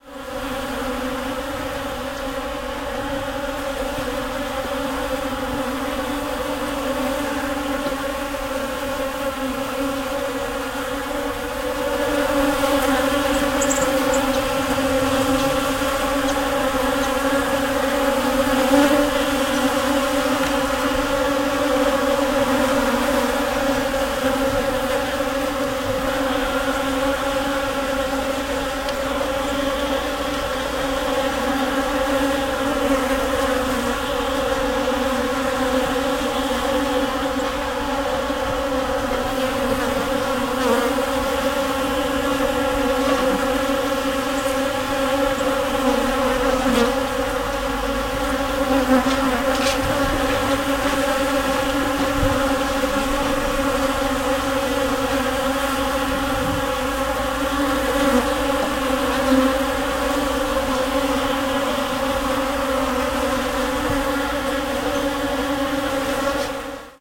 A Swarm of bees flying around flowers and food, we can hear some farm animals.
bees, bee, buzzing, swarm